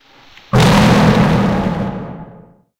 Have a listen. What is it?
Monster Roar
Spooky, Scream, Roar, Sinister, Scary, Dark, Evil, Horror, Jumpscare, Monster